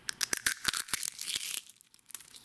Bone break/crack 1
Made with and egg :D
break, cracking, fracture, bones, bone, fight, crack, breaking, combat